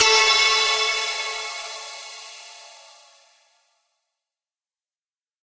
A bizarre, rather strange sounding hit intended to startle audiences or emphasize an action.